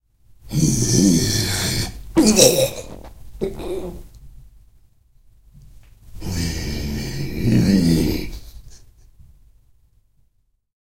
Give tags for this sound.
creepy; Fallen-Valkiria; ghoul; gore; horror; living-dead; moan; scary; scream-of-pain; spooky; terrifying; terror; undead; zombie

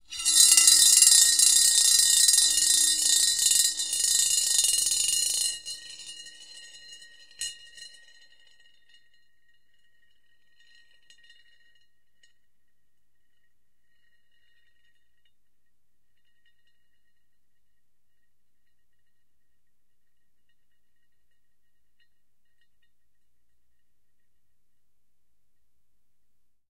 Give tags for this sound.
ceramic; roll; marbles; glass; ceramic-bowl; rolled; rolling; marble; bowl

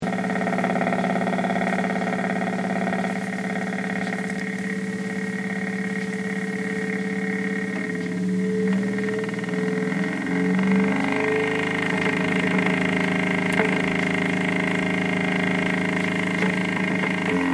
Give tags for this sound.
frequency-generator; hum

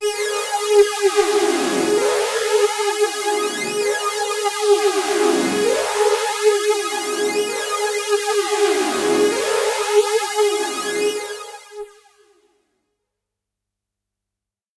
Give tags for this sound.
hard; multi-sample; synth

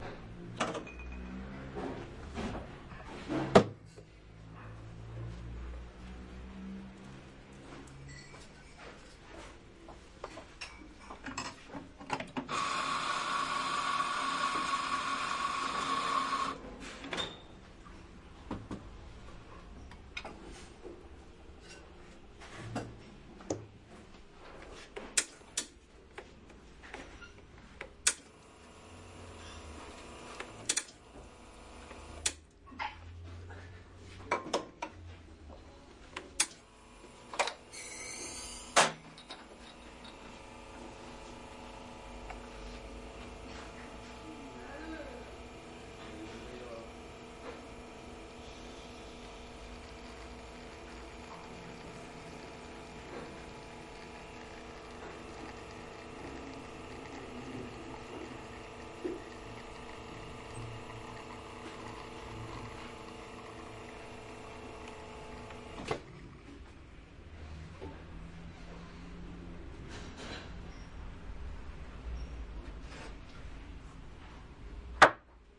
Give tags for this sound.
industrial; machine; coffe; vapor

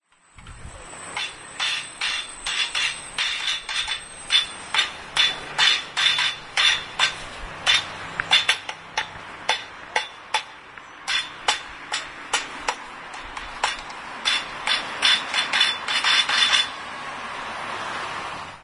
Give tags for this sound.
poznan; boy; child; knocking; street

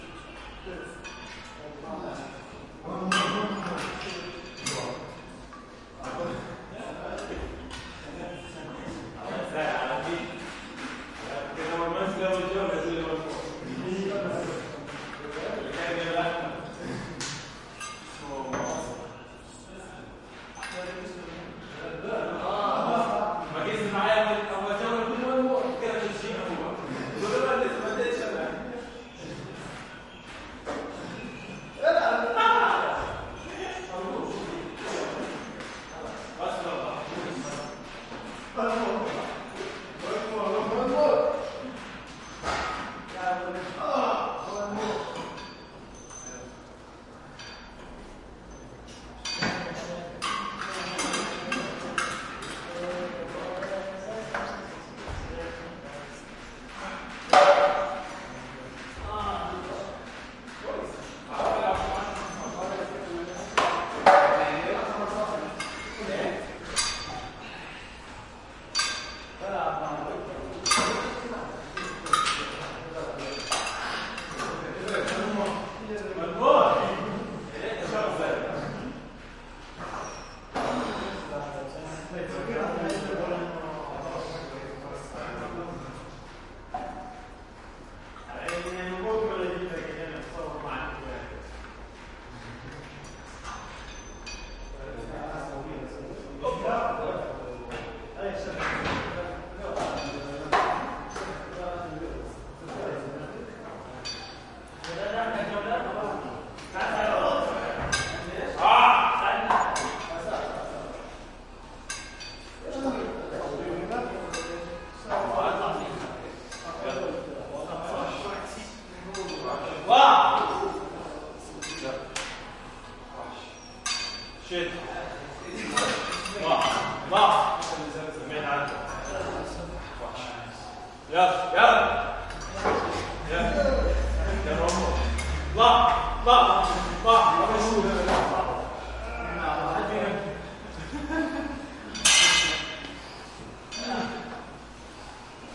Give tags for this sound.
guys; arabic; out; gym

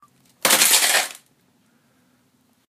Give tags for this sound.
laptop-scraping; laptop-slide-floor; laptop-slide

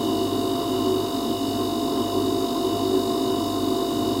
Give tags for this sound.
storage; fridge; freeze; compressor; cold; refrigerator

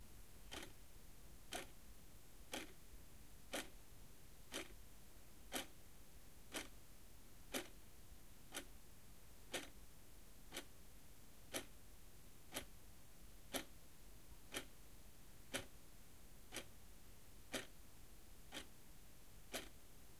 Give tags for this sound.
ticking; Analog; Clock

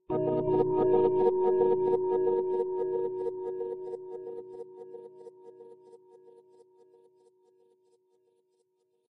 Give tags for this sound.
ambient; bell; convolution; echo; glitch; quiet; ring; sound-design; texture

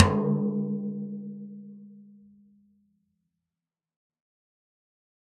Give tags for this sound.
1-shot; drum; multisample; tom; velocity

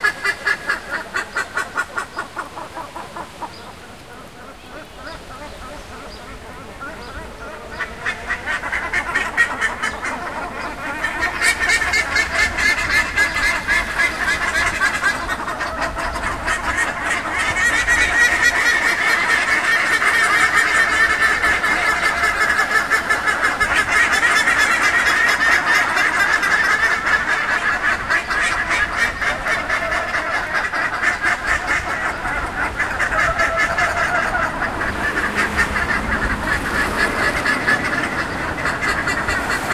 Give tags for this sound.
Theristicus; bandurria; birds; caudatus; chile; field-recording; lake; nature; winter

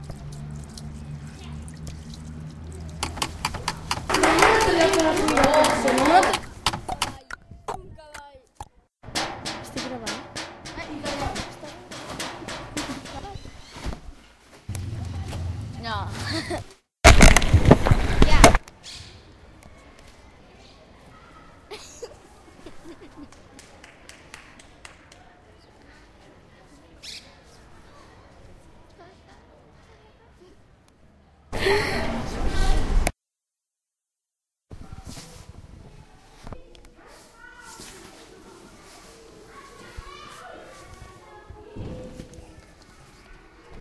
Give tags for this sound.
5th-grade; cityrings; santa-anna; sonicpostcard; spain

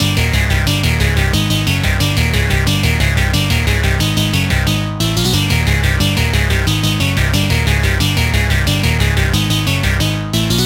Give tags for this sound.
massive; chord

